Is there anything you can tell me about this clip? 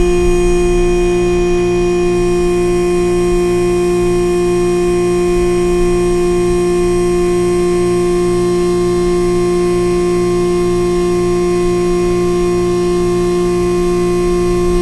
machine hum
A loud, buzzing hum from a power washer compressor. Recorded on a Marantz PMD660 with a Roland DR-20 dynamic microphone.
mechanical, motor, generator, machine, factory